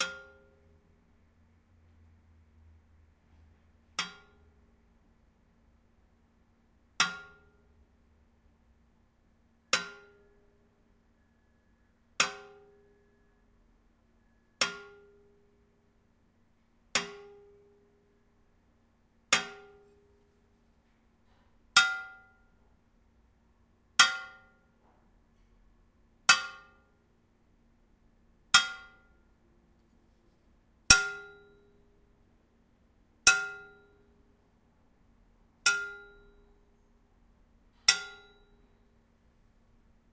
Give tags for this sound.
beat; pen; pipe; slow; tube

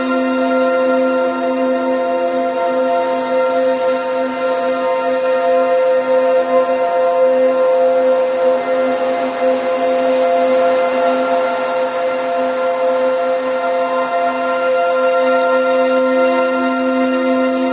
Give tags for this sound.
tape; peaceful; pad; electronic; atmosphere; loop

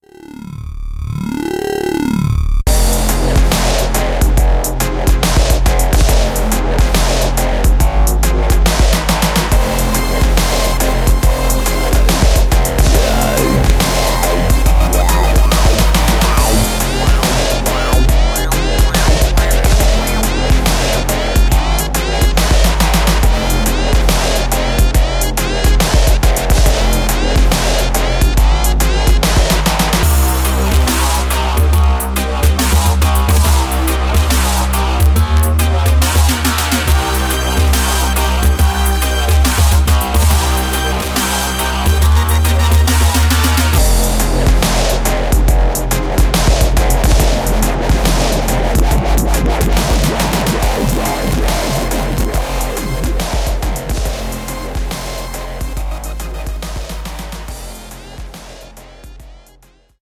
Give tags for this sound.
Music; Audio; Traxis; Jam; House; Synth; dubstep; Techno; Electro; Keyboards; Dub; Beats; Original; Clips

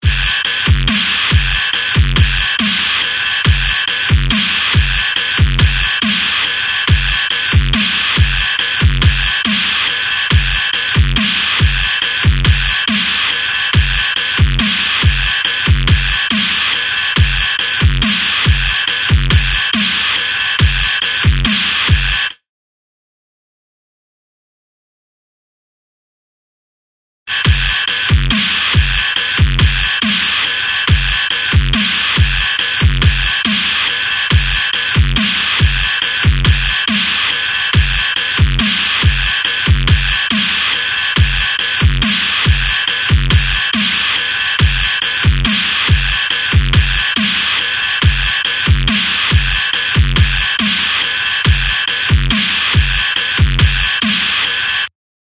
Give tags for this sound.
awesome; Hardcore; Dubstep; Epic; Distort

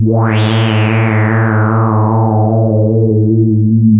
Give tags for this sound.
evil; horror; multisample; subtractive; synthesis

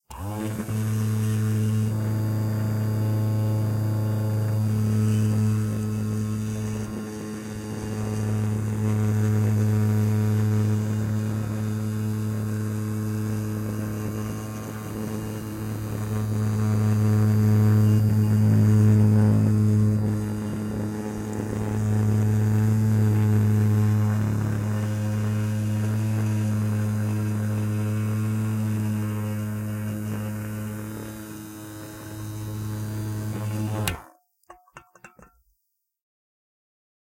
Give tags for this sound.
OWI; metal; machine; can; razor; engine; aeroplane; vibrate; electric-razor; vibrating; plane; buzzing

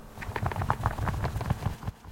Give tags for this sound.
avians; flutter; birds; bird; beating-wings; beating; flapping; flapping-wings; flying

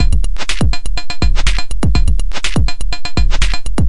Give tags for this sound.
pocket; operator; loop; 123bpm; drums; engineering; beat; machine; Monday; cheap; mxr; drum-loop; PO-12; percussion-loop; drum; distortion; rhythm; teenage

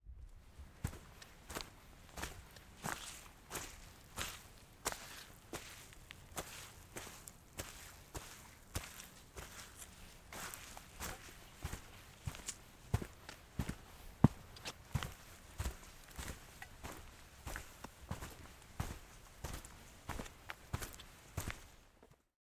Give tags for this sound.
walking; footsteps; hiking; heavy; step

